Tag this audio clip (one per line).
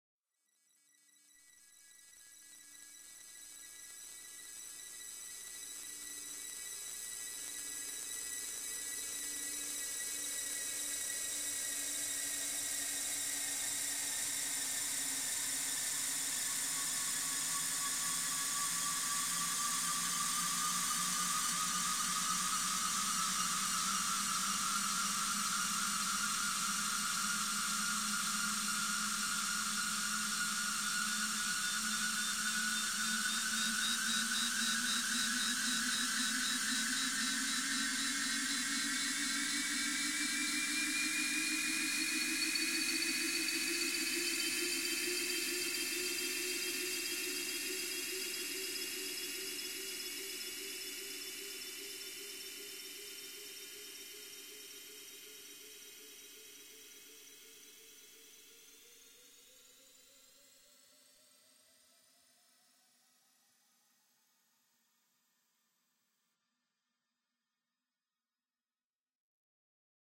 AmbientPsychedelic
ExperimentalDark
Noise